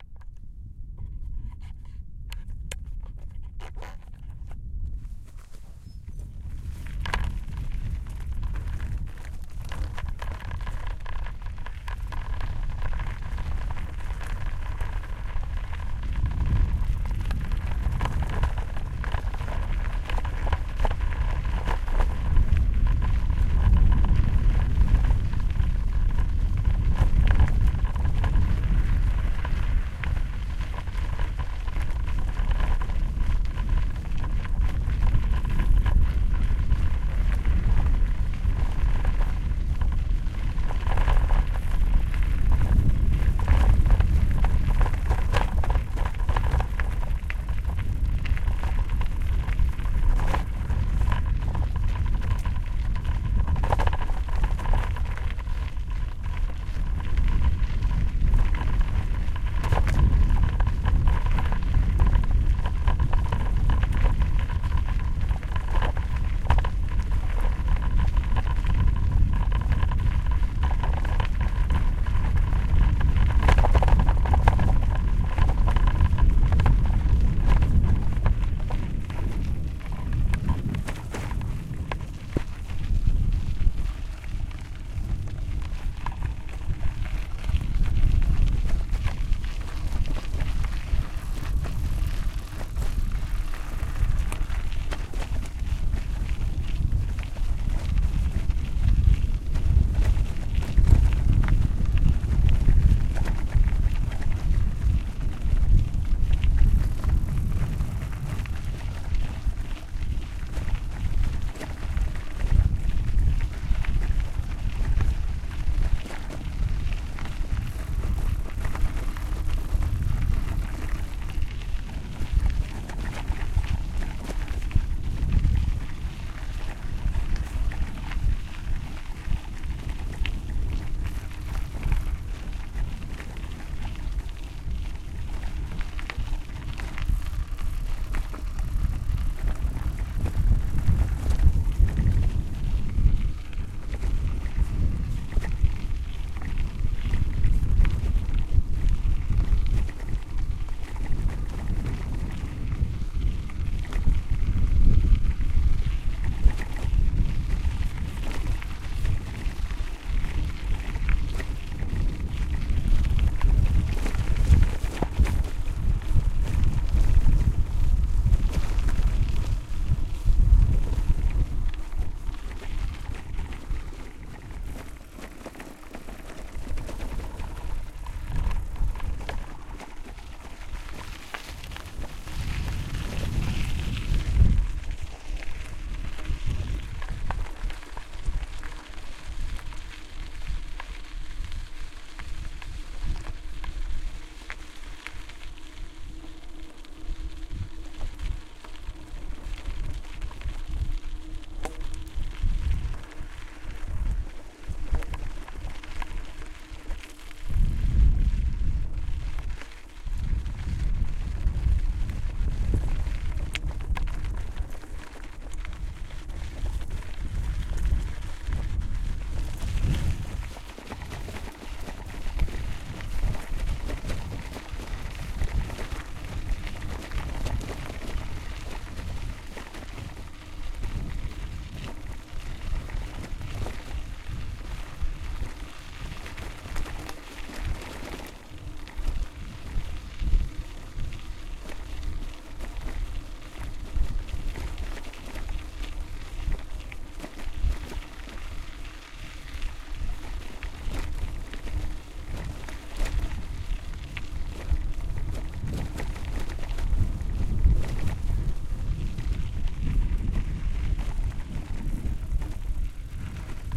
Fahrradfahrgeräusche mit Wind
Driving a MTB on a road with some wind noise
bicycle, driving, field-recording, wind